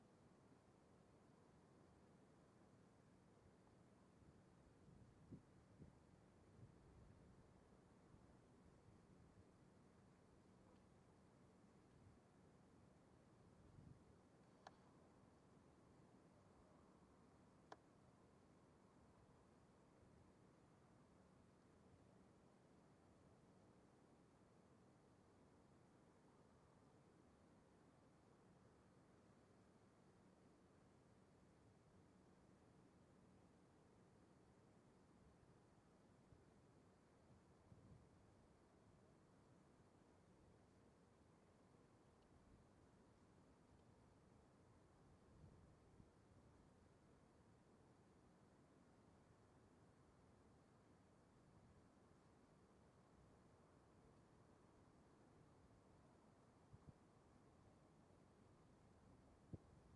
EZARO ORIEL AB 2M
Short recordings made in an emblematic stretch of Galician coastline located in the province of A Coruña (Spain):The Coast of Dead
coast, ocean, oriel, sea